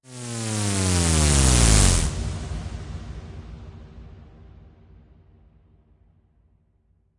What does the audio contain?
dirty whee effect
A processed synthesizer effect with some re-verb. Produced for trance productions.
electronic, fx, noise, sound-effect